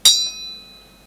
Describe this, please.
The sound of a teabag squeezer hitting the lid of an ornamental brass pot. It creates a generic "ding" sound. There is a small amount of static noise in the background.
Sounds slightly like a triangle I guess.
Recorded with a cheap PC Microphone.
brass, ding, lofi, metalic, noisy